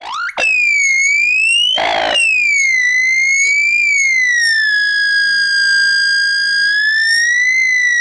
I made this sound in a freeware VSTI(called fauna), and applied a little reverb.